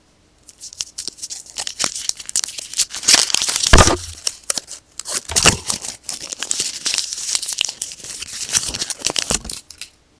Recorded CandyWrapper01
Opening up some candy from the wrapper.
candy, wrapper